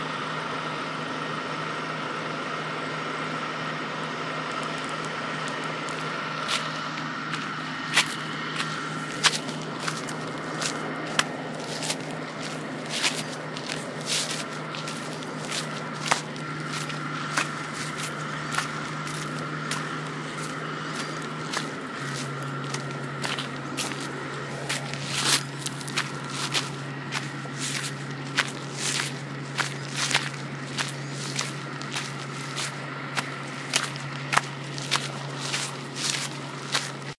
The sound of me somewhat casually walking on some gravel while wearing sandals.
Recorded in Winter Park, Colorado, United States of America, on Wednesday, July 17, 2013 by Austin Jackson on an iPod 5th generation using "Voice Memos."
An isolated sample of the bus in the background is at the beginning of the sound.